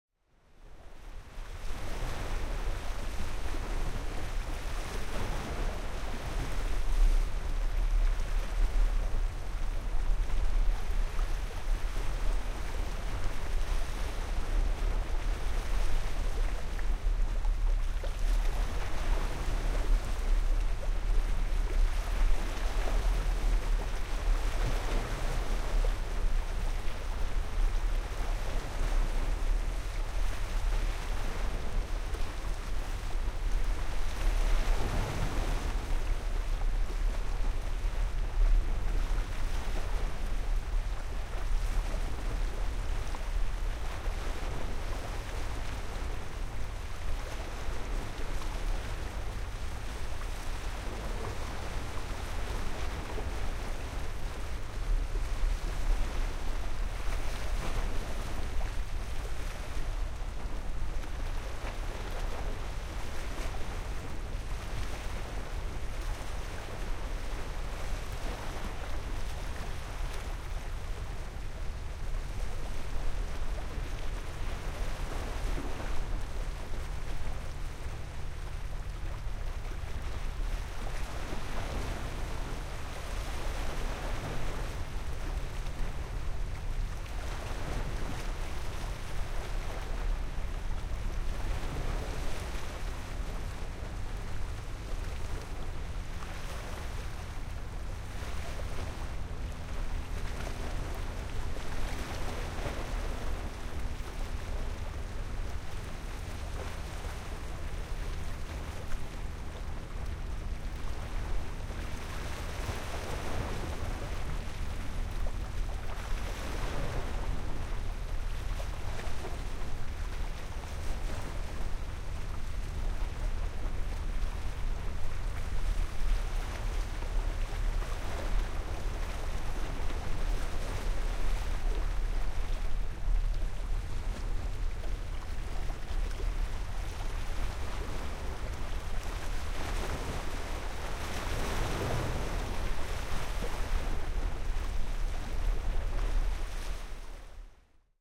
sample pack.
The three samples in this series were recorded simultaneously (from
approximately the same position) with three different standard stereo
microphone arrangements: mid-side (mixed into standard A-B), with a
Jecklin disk, and with a Crown SASS-P quasi-binaural PZM system. To
facilitate comparison, no EQ or other filtering (except
level normalization and mid-side decoding, as needed) has been applied.
The 2'28" recordings capture small, choppy waves breaking against the
rocky shore of the San Francisco Bay at Cesar Chavez Park in
Berkeley, CA (USA) on October 1, 2006. The microphones were positioned
approximately 6 feet (2 meters) from the Bay's edge, oriented toward the
water. A small airplane flying overhead becomes audible at about one
minute into the recording.
This recording was made with a pair of Sennheiser MKH-800
microphones in a mid-side configuration (inside a Rycote blimp).
The "mid" microphone was set to "cardioid" and the "side"